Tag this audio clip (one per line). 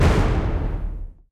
braams
hit
oneshot